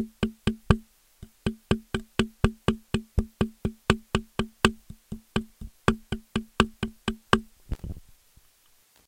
Hitting Microphone
I recorded the sound of me tapping my dynamic microphone.